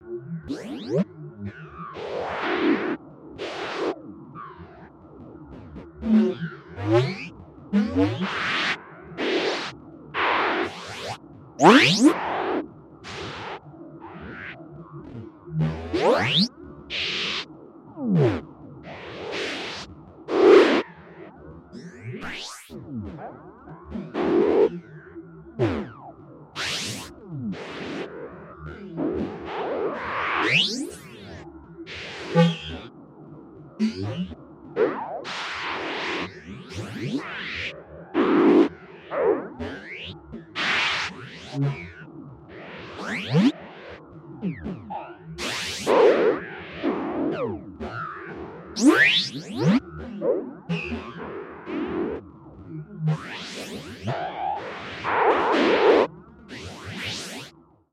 Space swirls
Disquieting, swirly sounds from a far-off galaxy. Sample originally generated using a Clavia Nord Modular and then processed with software.